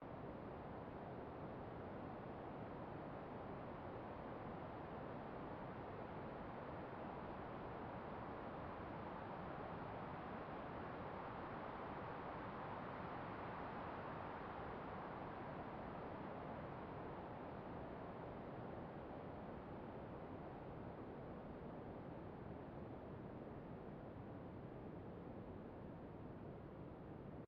Outside wind ambience
filtered whitenoise loop for background ambience